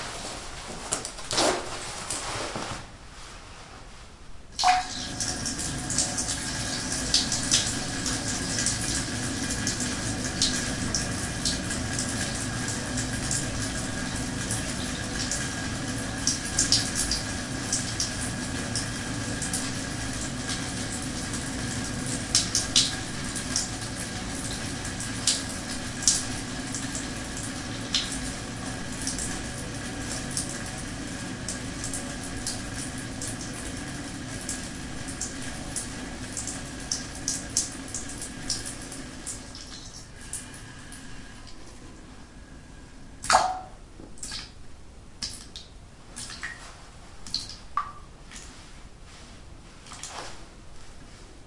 I take a leak in the loo.
Recorded with Zoom H2. Edited with Audacity.
h2, pee, peeing, pissing, toilet, urinating, water, wc, zoom, zoom-h2